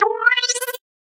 Timbaland-Style FX Hit 2
This sound is my attempt at emulating sound effects used by Timbaland in the productions he has done for Ludacris and Jay-z.
I only ask that you do this because I love seeing creativity in action!
dance, DIY, edm, electro, electronic, fx, glitch, idm, sound-design, synth, techno